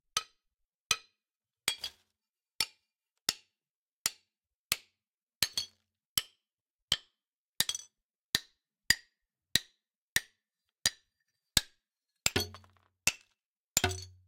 Sound of breaking porcelain.
broke, porcelain